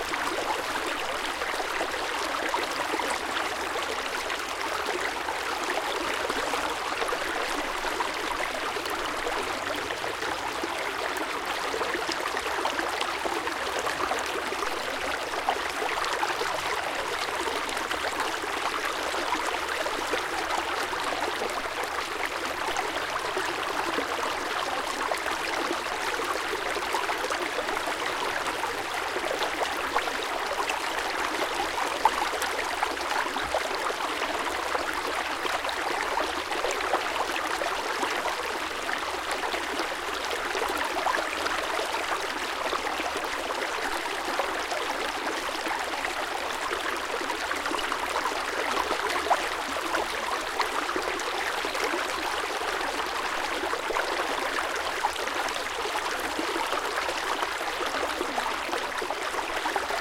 A Small river in the woods
brook, creek, field-recording, forest, nature, water, wood